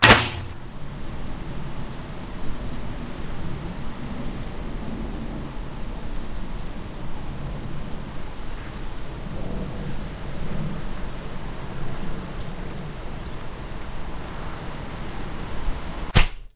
Arrow flying SFX